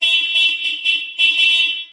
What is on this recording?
pito de carro
sonido pito carro grabado en parqueadero